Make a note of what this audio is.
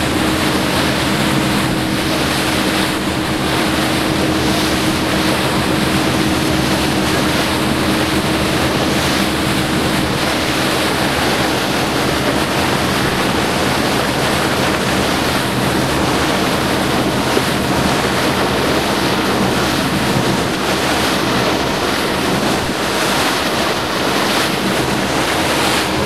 the noise made by the engine of a whale-watching boat, and splashing water. Recorded with a Canon camcorder